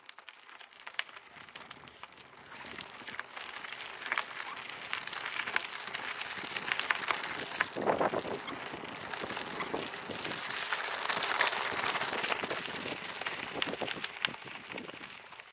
Bike On Gravel OS
Mountain-Bike Pedalling Gravel
Gravel, Mountain-Bike, Pedalling